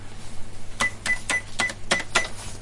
cash beep small market
Atmo in small market.
Recorded on ZOOM H4N
market, small, asian